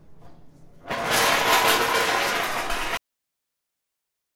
metal Chair
dragging, floor, chair